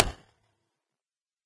A synthesized clicking sound
electronic, synth, pop, sample, click